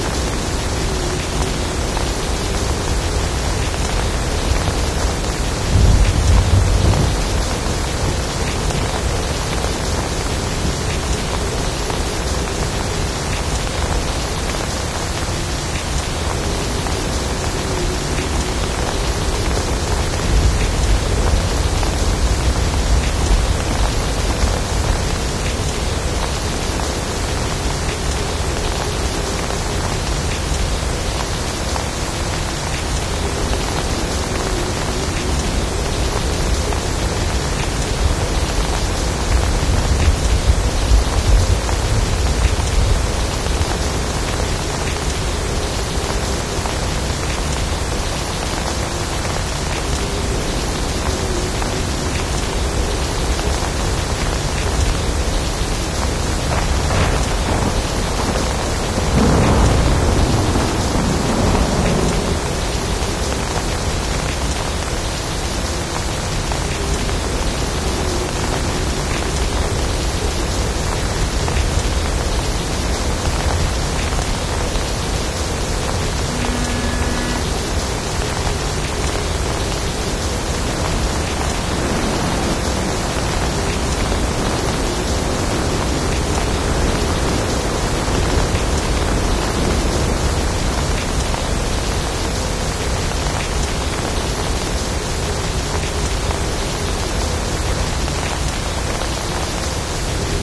Storm (loopable)
(ambiance effects recorded from Riverside, Pennsylvania.)
ambience, computer-generated, scape, sound, soundscape